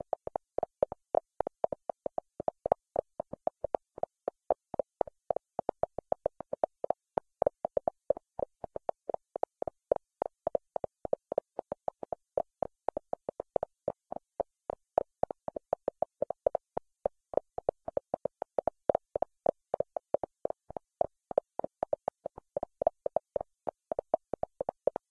stereo beatings 55
Random beats from a filtered noise source. Made in puredata